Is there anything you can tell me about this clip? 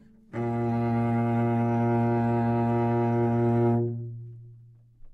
overall quality of single note - cello - A#2
Part of the Good-sounds dataset of monophonic instrumental sounds.
instrument::cello
note::Asharp
octave::2
midi note::34
good-sounds-id::2109
Intentionally played as an example of bad-pitch-vibrato